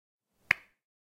Light turn on
This audio represents the sound of a turning on lamp.
Light, On, Turnin